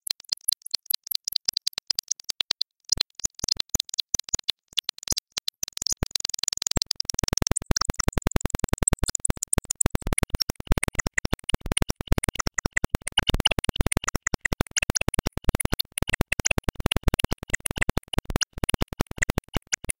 Feedback Phaser
PH-90 Phaser's feedback.
distortion, processing, feedback, phaser, Random